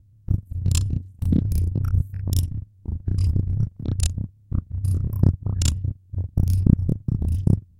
Weird Alien Static 1
This was made from the "Creepy Background Noise 1" I believe and was sped up and HIGHLY altered to sound like this.
abstract, alien, digital, electric, electronic, future, glitch, noise, radio, sci-fi, space, strange, weird